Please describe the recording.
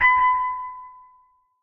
Start Sounds 002
Start Sounds | Free Sound Effects